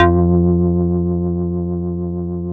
House Organ F2
A multisampled house organ created on a shruthi 1 4pm edition. Use for whatever you want! I can't put loop points in the files, so that's up to you unfortunately.
Happy-hardcore, House, Multisampled, Organ